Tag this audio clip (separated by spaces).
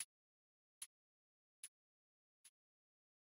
808 909 cheap chile closed drum drums hat hh hhclosed hi mismo percussion porn processed reaper sample synthetic yo yomismo